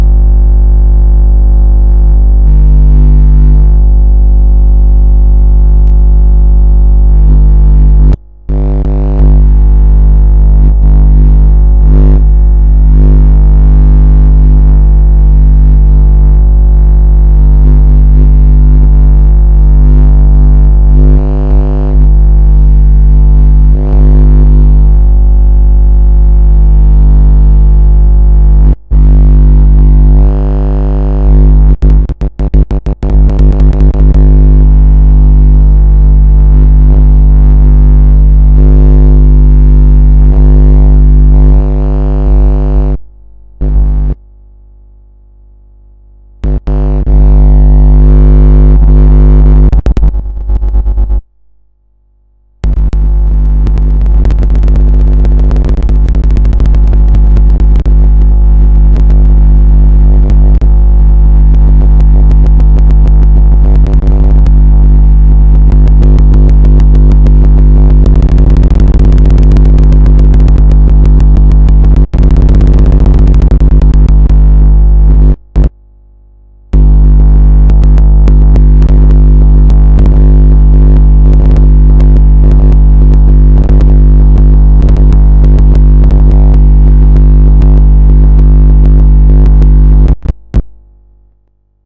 RCA cable going into MOTU interface, touching with finger, overdriving the pre-amps.
glitch, electric, buzz, touch, wet, distortion, electricity, fuzz